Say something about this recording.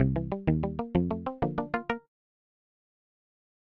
A short jingle that represents a successful action, end of level in a video game, or any other kind of job well done.